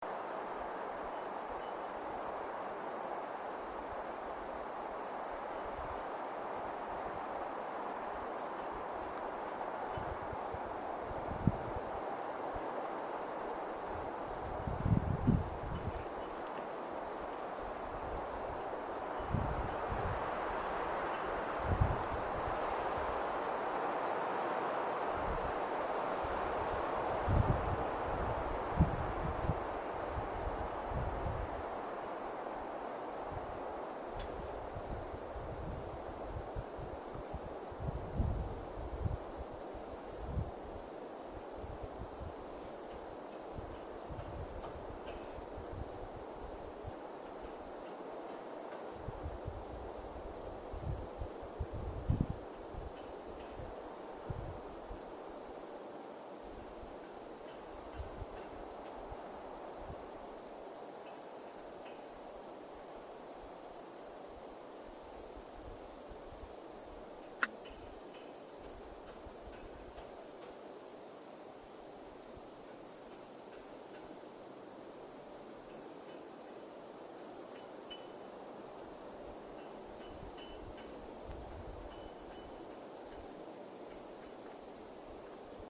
A 1 min. 25 sec. The wind eventually gets a little lighter toward the end of the recording. Includes a bit of breeze on the microphone but only enough to add to the effect. Recorded with a black Sony IC digital voice recorder.